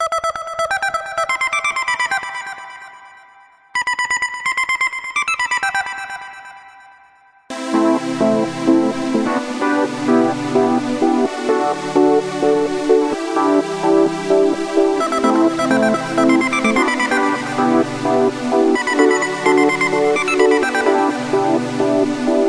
A small melody with a pad.
One-shot, pad, synth